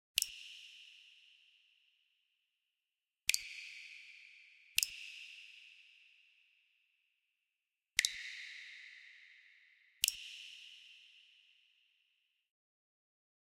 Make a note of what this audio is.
Drip drops leaky basement
Water drops in basement
basement, drops, water